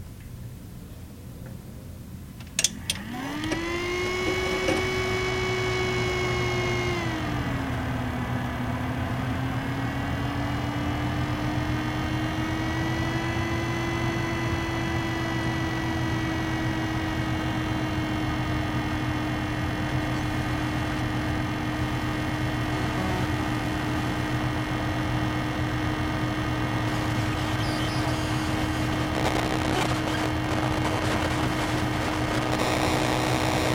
004 - CPU On.L
This is the noise of my PC AMD FX6300 (lillte old :D) booting.